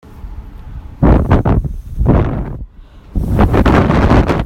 Loud Wind
Loud, wind, outside